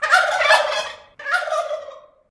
Turkeys gobbling in barn
Turkeys gobbling in a barn; short